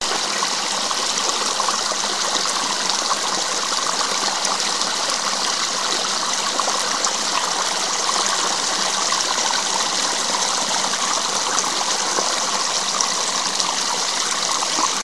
Sound of a creek
you can loop it